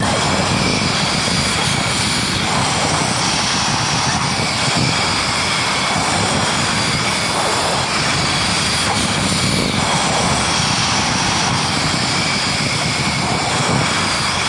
Strong wind voice FX made with layers of voices